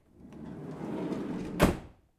Van sliding door close
Closing a sliding door.Recorded with a Zoom H5 and a XYH-5 stereo mic.